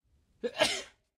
Accidentally sneezed while recording, idk if its useful.